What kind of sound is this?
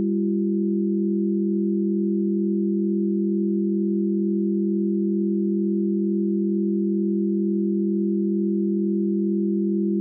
test signal chord pythagorean ratio